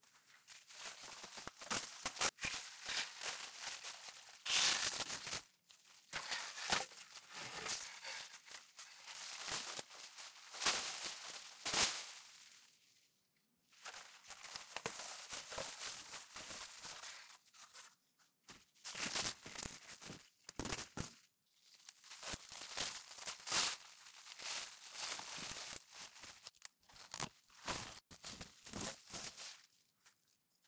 Bush weed grass cut rip tear
Part of a series of sounds. I'm breaking up a rotten old piece of fencing in my back garden and thought I'd share the resulting sounds with the world!
tear, Bush, grass, rip, weed, cut